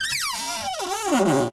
Grince Plac Lg Hi-Lo 2
a cupboard creaking
creaking, cupboard, door, horror